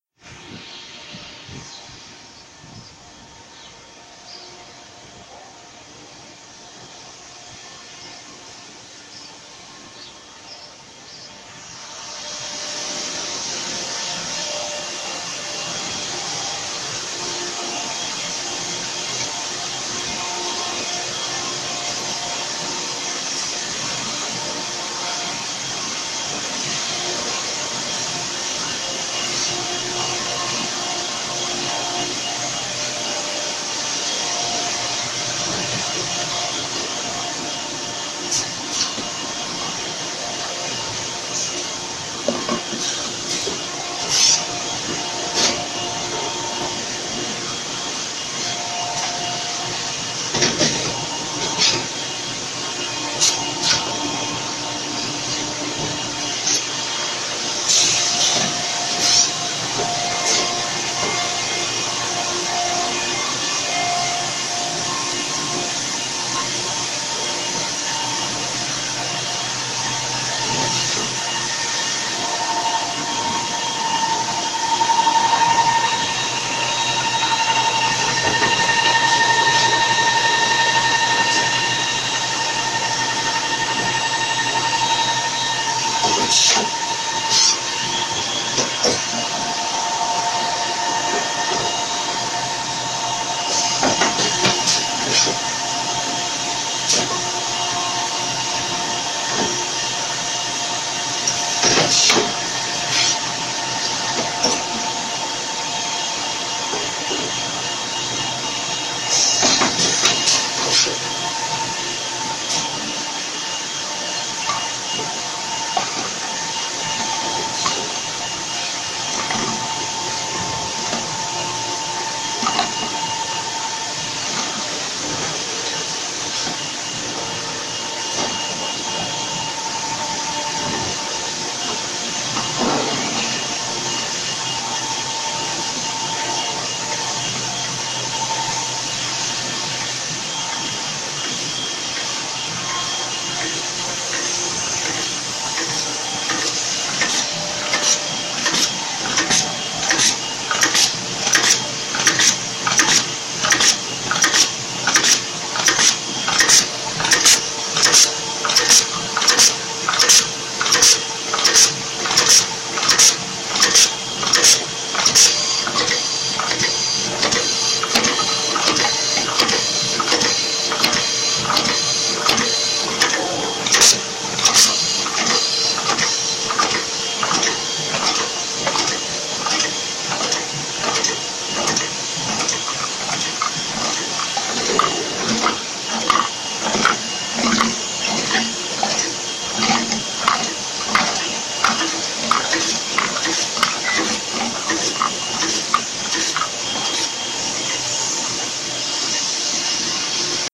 Liquid chlorine packaging plant - 2
Walking into a liquid chlorine packaging plant... not recorded with an optimum device
chlorine, packaging, factory, noise